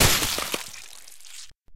An exceptionally wet and fleshy explosion. Ideal for a video game/action film in which a powerful attack brutally reduces an enemy/enemies to a bloody, messy pulp. Works well alongside the sound of an explosion, melee hit, sniper rifle hit, heavy falling object hit, etc. Could work with horror media as well.
Made using a lot of sound from a bunch of different sources. Produced with Ableton Live 9.